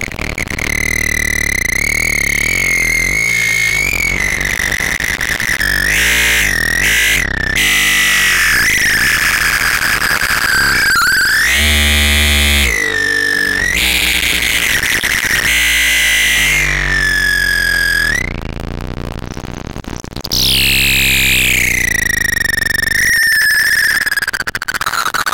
Mute Synth Modulated HighPitch 001
I recently bought a 'mute synth'.
Calling it a synth is a bit over the top. I would call it a noisemaker.
Due to the way this thing is 'controlled' sounds are unpredictable and hardly ever reproducible. Best to just play with it and record, and then cut the good bits.
The mute synth contains 2 oscilators. The user's hands (preferably with wet or moist fingers) act as bridges between points in the circuit. In certain positions it is possible to get the oscilators to modulate each other, as well as a variety of other effects which all are difficult to control and impossible to replicate!
beep, blip, electronic, lo-fi, modulated, mute-synth, noise, noise-maker, pulse, shriek, square-wave